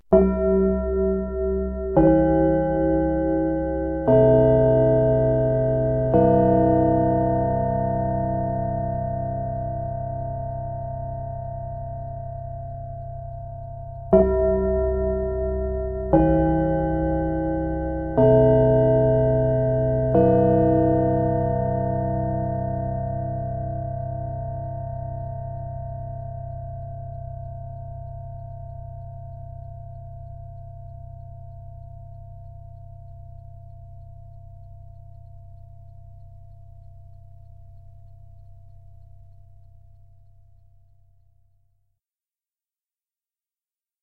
Gong Pause 2x
This is a real 1960's Wandel & Goltermann electromechanical four-tone gong. Gongs like this were in use in the PA systems of German public buildings like schools and theaters to indicate begin and end of pauses. Recorded directly (no microphone) from its internal magnetic pickup. Four tones descending, repeated once.
school, pause, theater, gong